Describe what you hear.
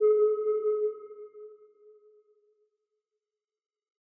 archi sonar 05
I created these pings to sound like a submarine's sonar using Surge (synthesizer) and RaySpace (reverb)
ping, pong, sonar, sub, submarine, synth, synthesized